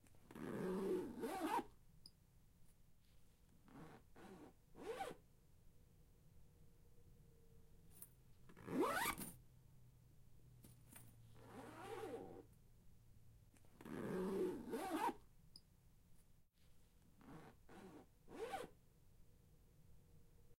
Bag Zipper
Stereo Recording of a Zipper
Zipper, Metal-Zipper, Bag